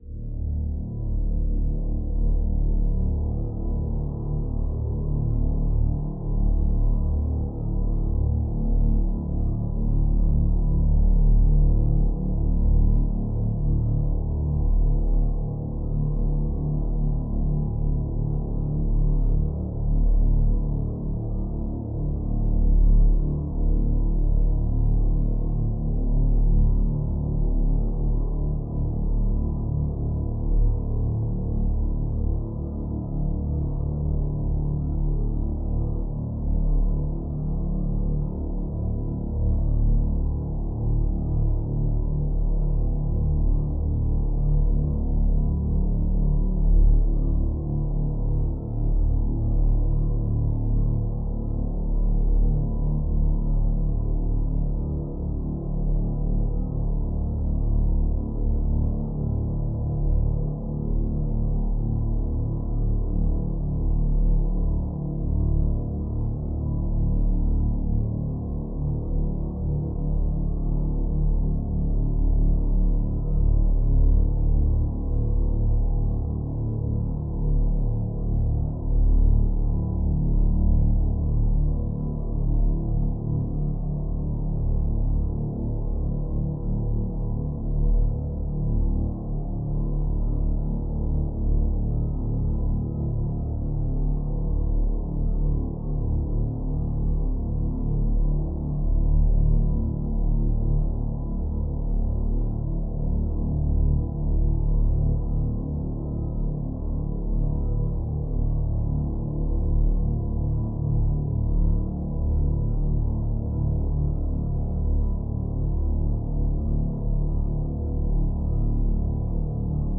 Two minutes of dark drone sound